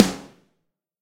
BNSE SNARE 003
Various snare drums, both real and sampled, layered and processed in Cool Edit Pro.
drum, processed, sample, snare